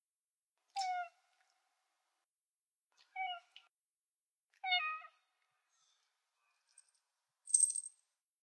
Sound of my cat who can't really meow trying her hardest
meow, meowing